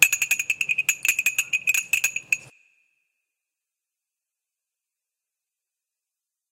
A plink sound made using a toy. Recorded in a library, using a Mac's Built-in microphone.
weird, toy, plinking, creepy, plink